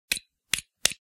Different Click sounds
Click, lego, stone